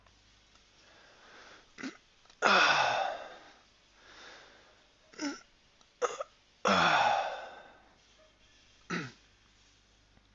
In my animation project , I needed a sound of yawn, when a young male gets out of the tent in the morning. In short time I just found some samples are little "noisy" to the scene, then I decided to make a "silent" one by myself.
The equipment used: the mic on Logitech H600 Headset, HP pavilion Laptop
Software: Adobe Premiere Pro running on windows 8.1
Location: my study + bedroom